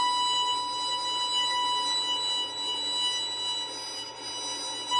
poppy B 4 pp sul-pont
recordings of a violin (performed by Poppy Crum) playing long sustained notes in various expressions; pitch, dynamics and express (normal, harmonic, sul tasto, sul pont) are in file name. Recordings made with a pair of Neumann mics
high, long, note, pitched, shrill, squeak, sustain, violin